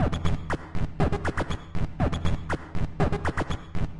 NoizDumpster Beats 04Rr
I have used a VST instrument called NoizDumpster, by The Lower Rhythm.
You can find it here:
I have recorded the results of a few sessions of insane noise creation in Ableton Live. Cut up some interesting sounds and sequenced them using Reason's built in drum machine to create the rhythms on this pack.
All rhythms with ending in "Rr" are derived from the rhythm with the same number, but with room reverb added in Reason.
TheLowerRhythm, rhythm